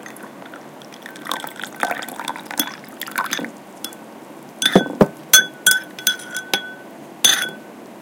noises made while serving tea. Soundman OKM capsules into FEL preamp, iRiver H120 recorder